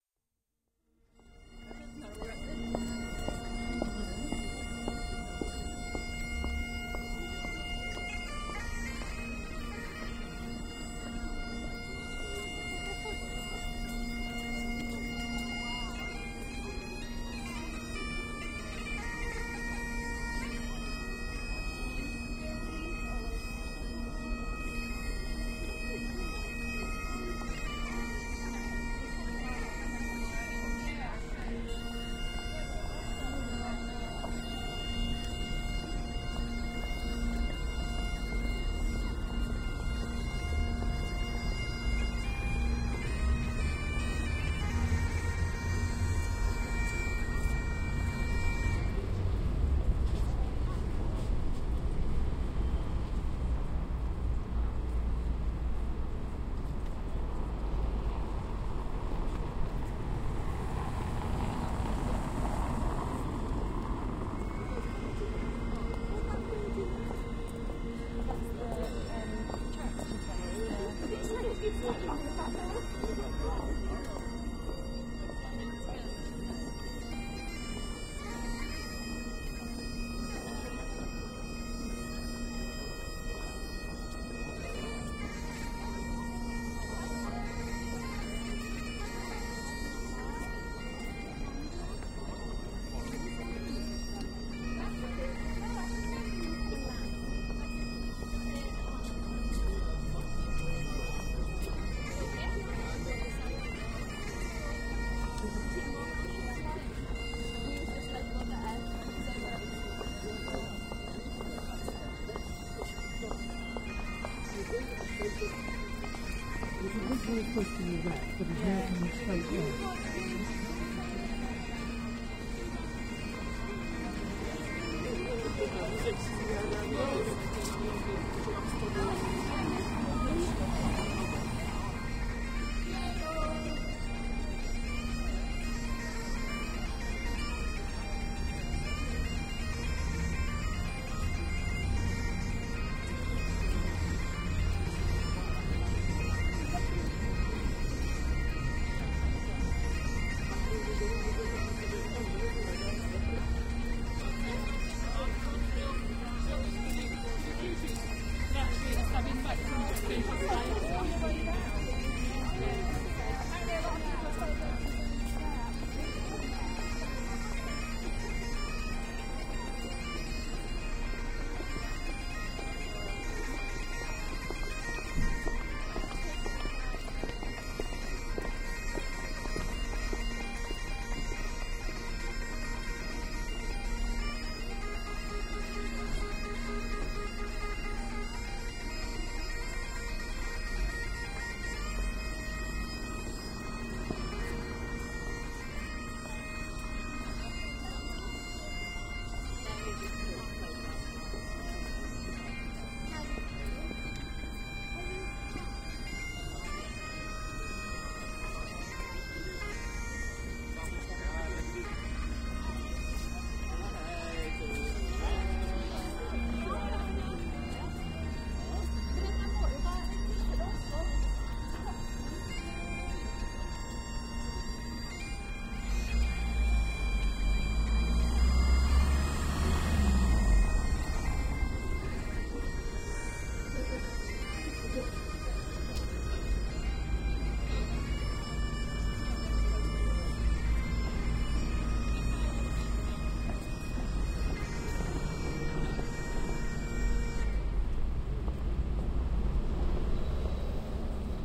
Edinburgh Bagpipe Busker
Bagpipe player busking in Edinburgh.
Approximately 20ft away; occasional passing traffic and pedestrians.
Recorded using Marantz PMD-661 with on-board mics.
Bagpipes, Busking, Edinburgh, Live, Music, Scotland, Street, Traditional, Travel